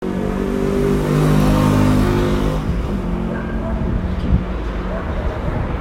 Street Sounds - Motorbike
motor, moto, transports, motorbike